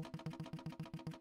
A pad-noise trill on the alto sax.
trill, smith, howie, sax, pad